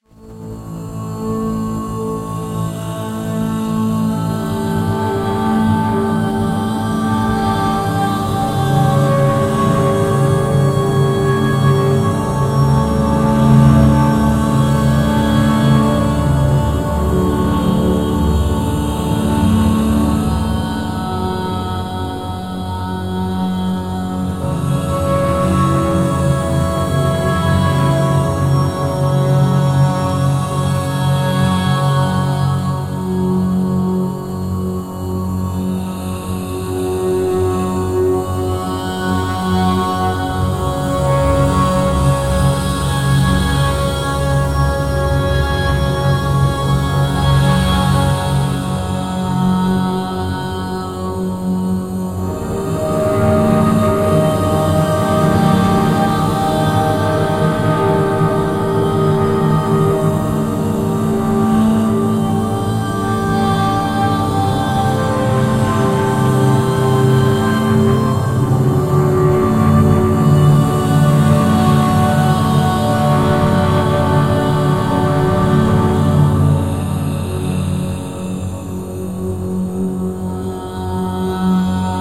Atmo Vocal Choir Drone Synth Dark Thriller Sad Mood Cinematic
Ambient, Atmo, Atmosphere, Choir, Cinematic, Dream, Drone, Mood, Movie, Sad, Synth, Thriller, Vocal